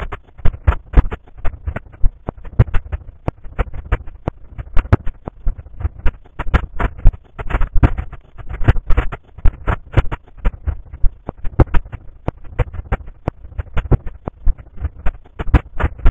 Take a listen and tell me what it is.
texture minimal pattern 001
Processed texture. Feels more like a pattern.